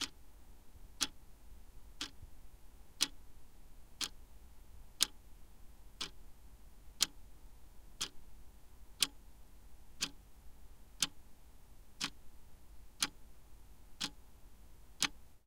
A clock ticking.
ticking, time, tock